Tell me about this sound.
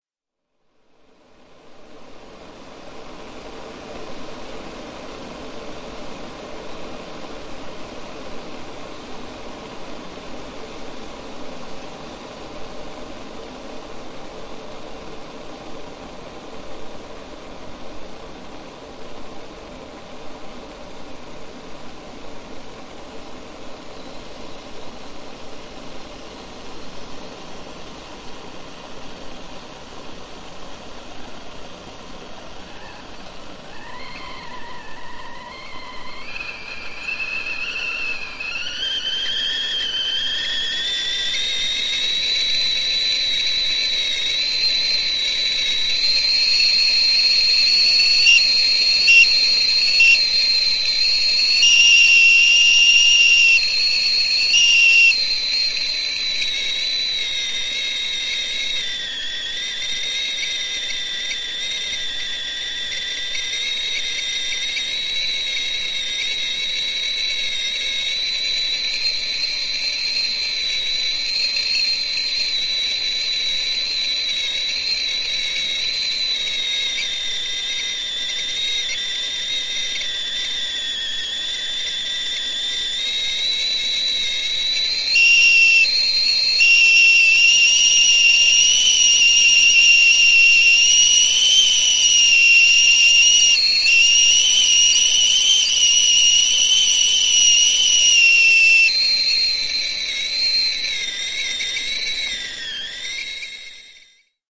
151018 0273-tea kettle-edited
A short clip of a tea kettle on a modern stove. The sound of water boiling and a few variations of the whistle.
boil, kettle, steam, tea, water, whistle